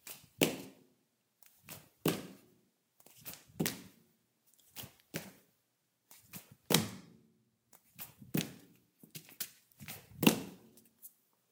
01-13 Footsteps, Tile, Male Barefoot, Jumping
Barefoot jumping on tile
jumping, tile, footsteps, kitchen, jump, linoleum, male, barefoot